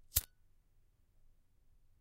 Bic Lighter 01
Bic lighter flick inside a car recorded on a Zoom H1.
lighter, bic-lighter, bic